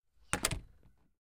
door - close 01
Closing a door.
close, closing, closing-door, door, door-close, shut, shutting